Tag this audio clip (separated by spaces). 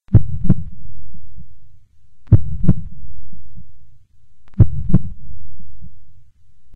heart heart-beat heartbeat human panic stethoscope